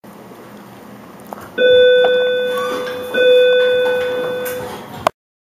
This is a common middle school High school Bell Tone Like my other one but shorter
keys, instrument, common, horror, sound, hammer, harp, tone, string, tension, School, hit, sustain, piano, spooky, acoustic, hand-inside, pedal